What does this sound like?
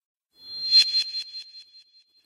Made anoher swish sound.